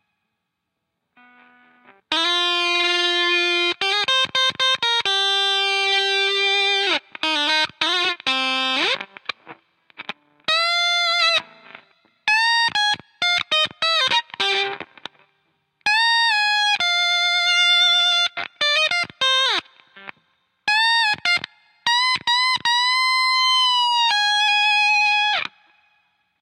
CTCC FUZZ 03
Guitar fuzz loops of improvised takes.
fm, guitar, fuzz, 100